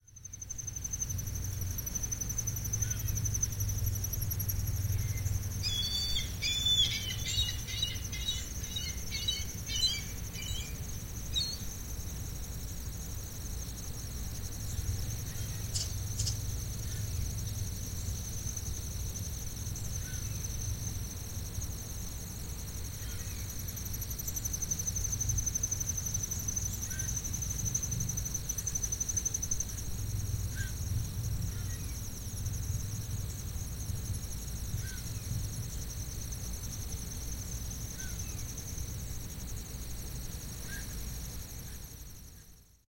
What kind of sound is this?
crickets chirping (with birds and other bugs)
This is a part two to the first version I made. This one has a lot more going on but sounds really awesome! Can't wait to see how you guys use this in your projects.
ambiance, ambience, ambient, birds, bug, bugs, chirp, chirping, cricket, crickets, evening, field-recording, forest, general-noise, insect, insects, nature, night, seagull, summer